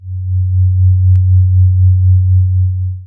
I first generated a sinusoid at 440 Hz with audacity, I then changed the pitch to F#/Gb low and I applied a tremolo effect wetness 40% and a frequency of 4.0 Hz.
We can also ear crossfades in and out.